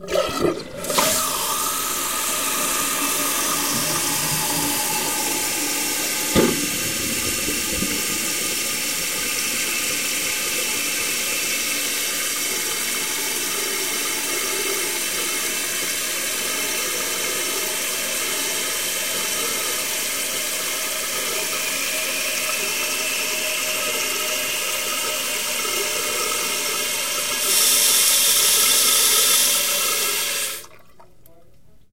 PFRamada201FromTank
Here's a toilet from Pigeon Forge, Tennessee, recorded in May 2010, using a Zoom h4 and Audio Technica AT-822. This was captured from the perspective of the open tank, not the bowl.
flush, glug, gurgle, toilet, water, wet